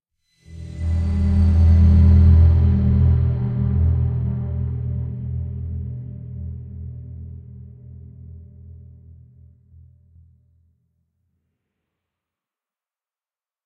PORTAL LOG IN
warm wooshy login type sound
computer, enter, entrance, login, mnemonic, open, portal, swoosh, warm, warp, whoosh, woosh